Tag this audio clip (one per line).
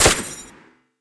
shot
arms
military